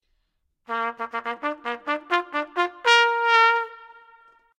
trumpet mono
Otherwise it is the same as the source sound. If you like the sound click through to the source this is a lossy conversion.
16bit, learning, mono